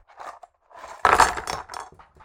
Pencil's falling